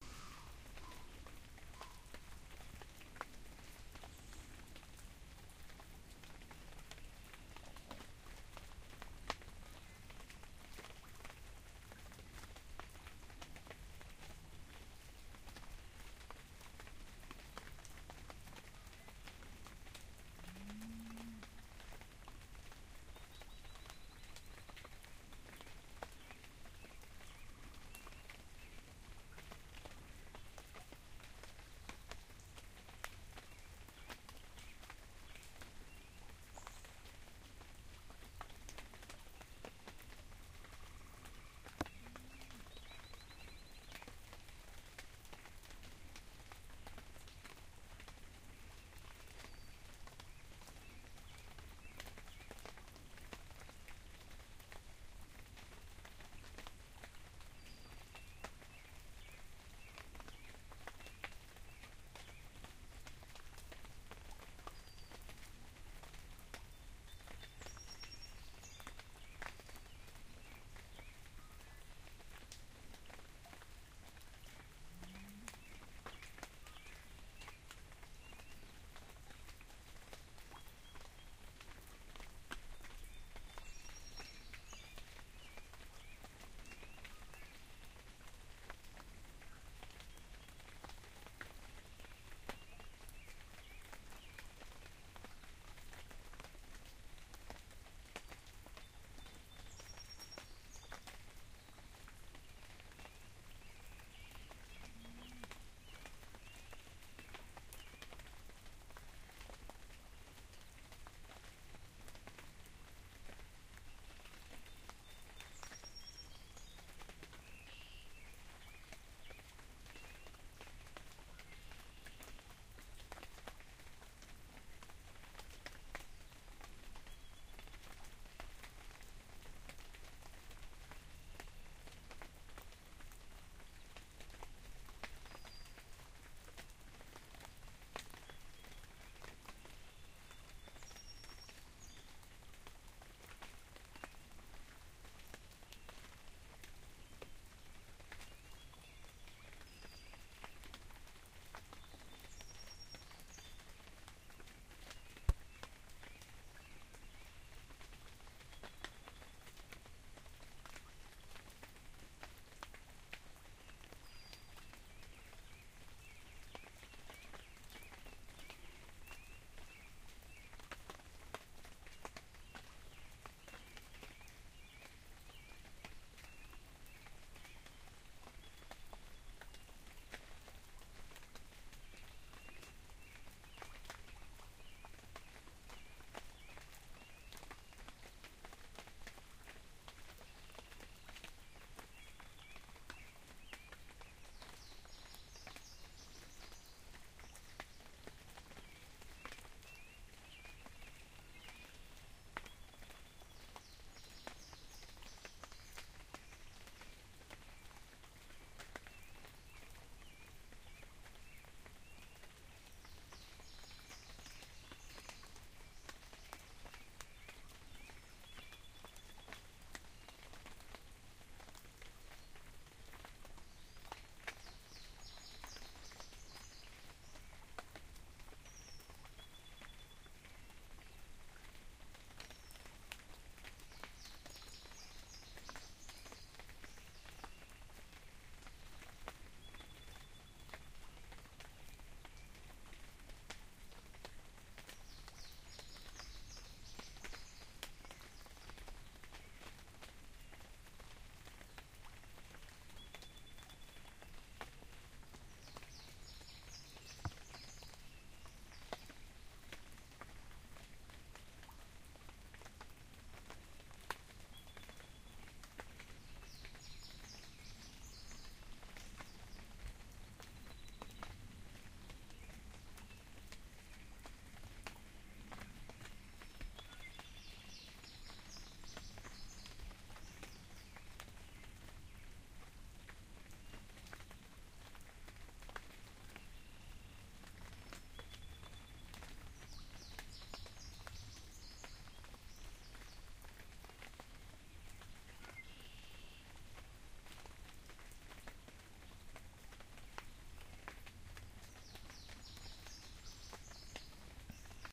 Rain by the creek
By a creek in West Virginia, near a cow pasture and under a large tree. The track opens with the vocalization of a startled deer, then subsides into a relaxed ambiance. Rain falling does nothing to dampen the spirits of the birds. This is a very "drippy" rain as large drops collect on the leaves and fall heavily to the ground.
Rain falling, birds singing, cows, and the quiet sound of the creek. Occasionally a frog. Light/distant automobile noise, not too disruptive.
Recording date: July 8, 2011, 5:37 PM.
birds, cows, creek, field-recording, nature, rain, unedited, west-virginia